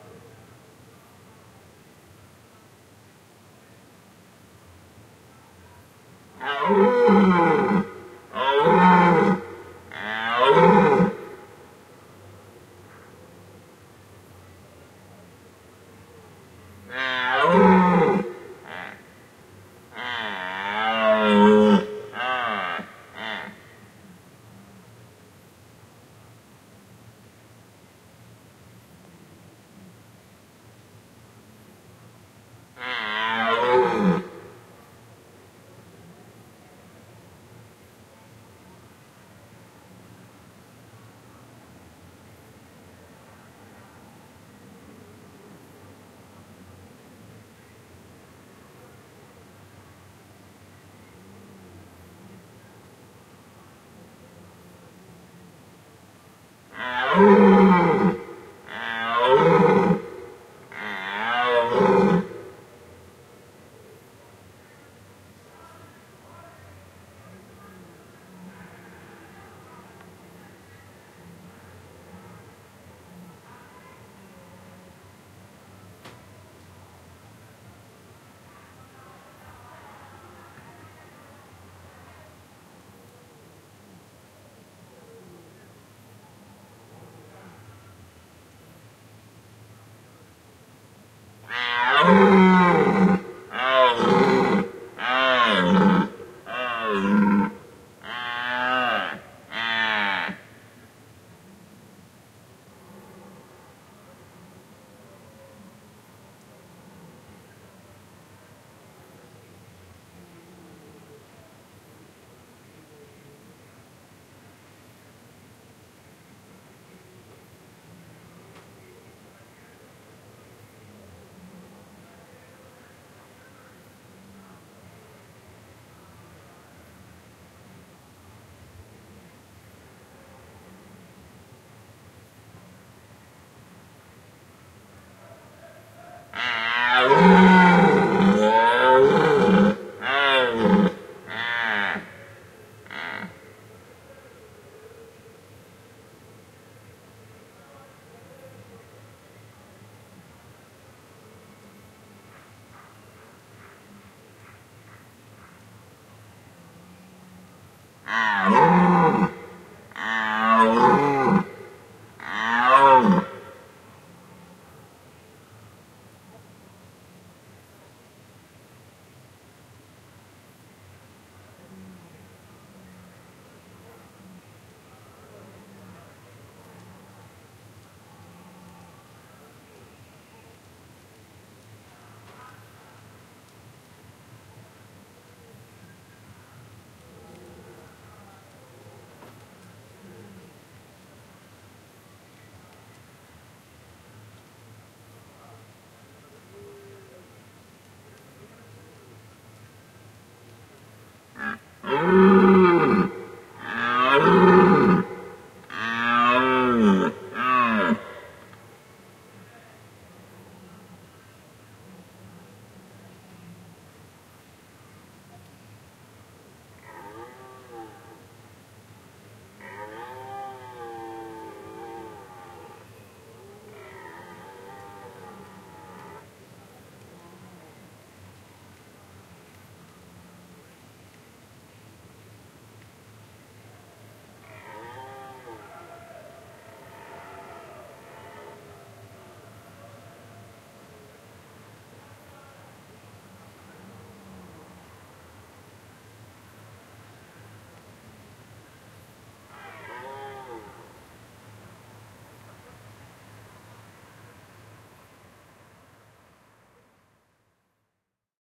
Czech Bohemia Deer Close
Deer calling and rutting in Czech Republic Bohemia area
Close up
deer, gutteral, countryside, animal, field-recording, nature, creepy